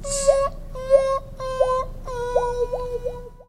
vocalized-wah
From an audio play - this was a faux commercial. this was a rehearsal, before we had the actual trumpet, so our actor played the part quite well.
trumpet, vocalized-trumpet, wah